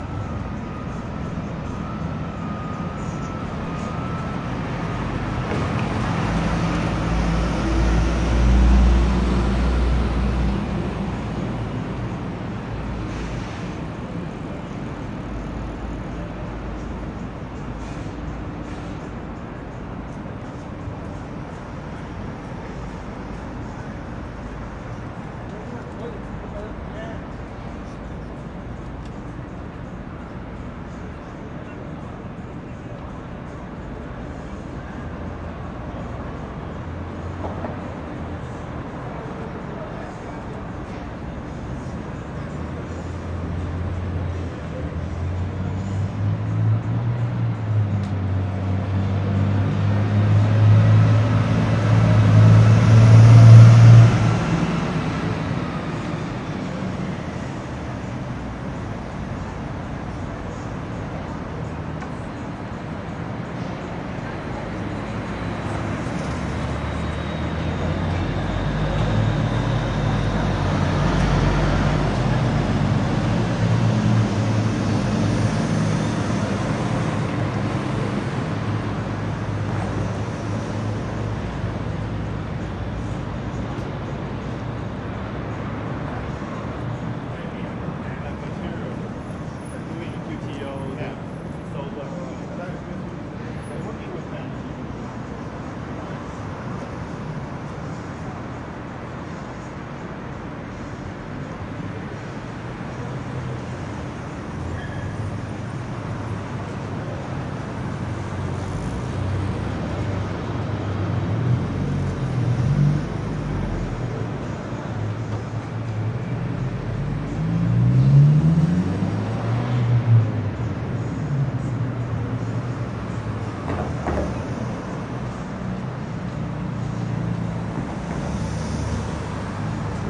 Downtown LA 01
One in a set of downtown los angeles recordings made with a Fostex FR2-LE and an AKG Perception 420.
recording, angeles, field-recording, street, ambience, downtown, city, ambient, los, people, field, traffic, urban